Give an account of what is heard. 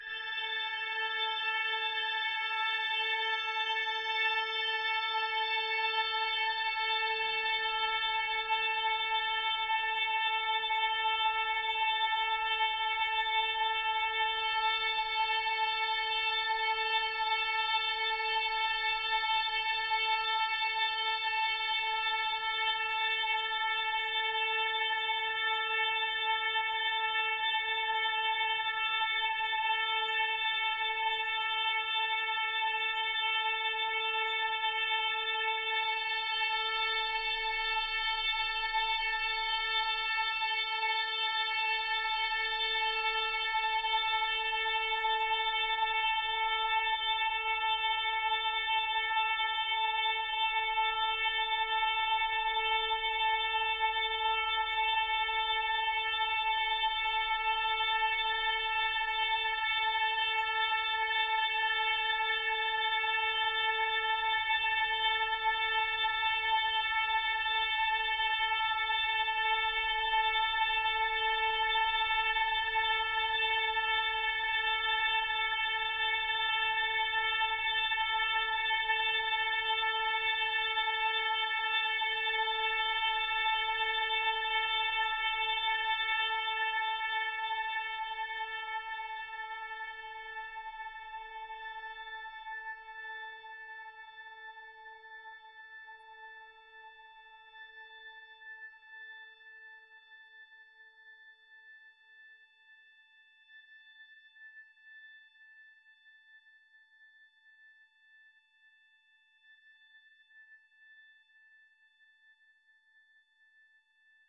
LAYERS 016 - METALLIC DOOM OVERTUNES-94
AYERS 016 - METALLIC DOOM OVERTUNES is an extensive multisample package containing 128 samples. The numbers are equivalent to chromatic key assignment covering a complete MIDI keyboard (128 keys). The sound of METALLIC DOOM OVERTUNES is one of a overtone drone. Each sample is more than one minute long and is very useful as a nice PAD sound with some sonic movement. All samples have a very long sustain phase so no looping is necessary in your favourite sampler. It was created layering various VST instruments: Ironhead-Bash, Sontarium, Vember Audio's Surge, Waldorf A1 plus some convolution (Voxengo's Pristine Space is my favourite).
ambient, pad, multisample, drone